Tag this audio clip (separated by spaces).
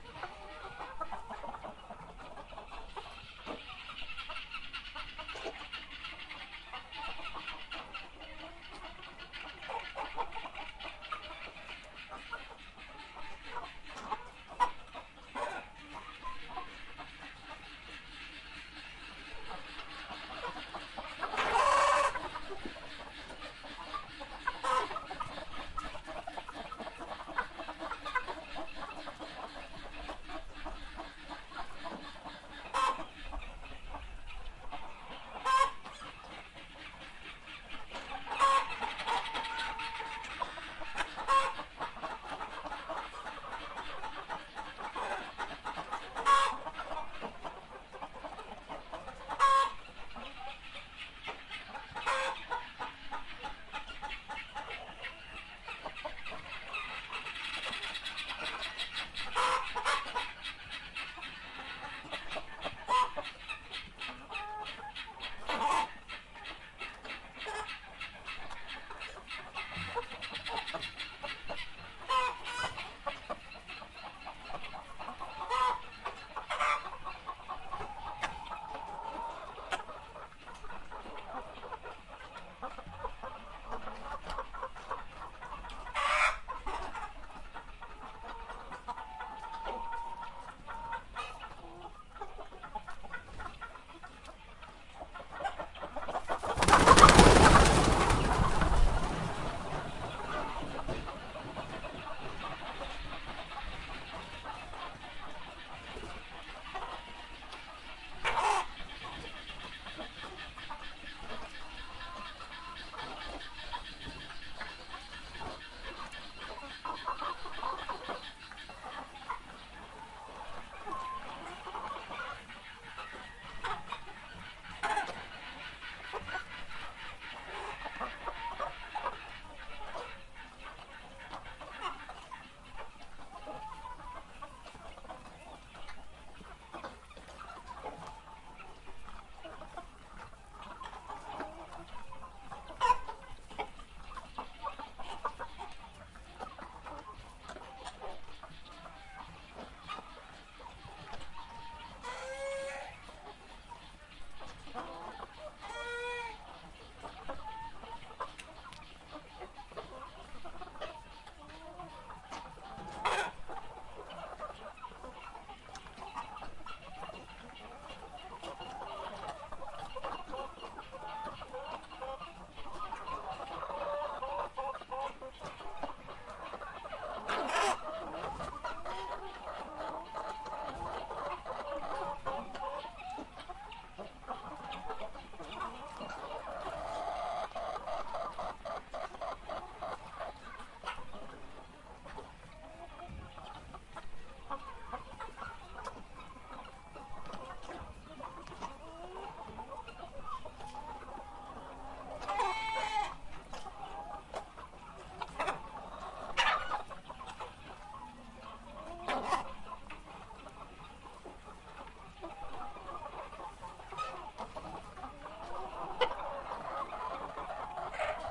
henhouse chickens clucking